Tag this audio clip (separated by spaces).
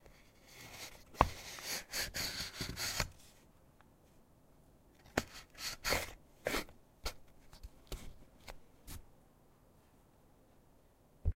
box friction wood cardboard open owi sliding closing